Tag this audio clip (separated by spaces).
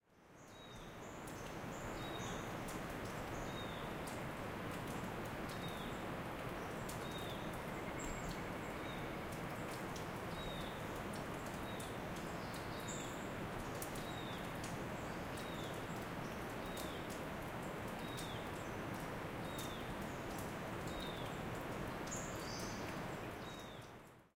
ambience ambient atmosphere background cave drip dripping field-recording forest quiet water